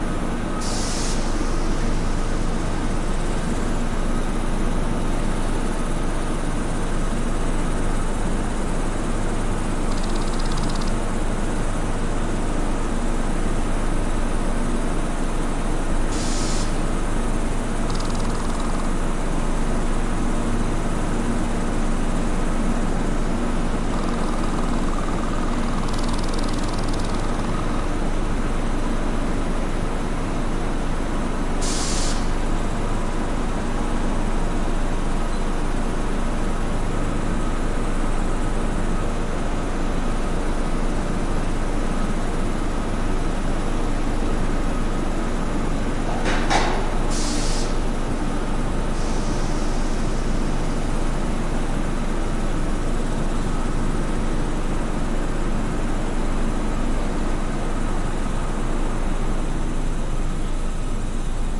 kronos hopter
This is a mix of a mono recording that takes a detail from a machine with a general binaural recording of the same environment. The mono recording was made with a Sanken CS3e on a Tascam DR-100, the binaural with a CS10-EM on Roland R-05. It was done in Kronos on the 10th of december 2014. The mix was done in reaper.
binaural, ambient, field-recording, noise, industrial, insect, hiss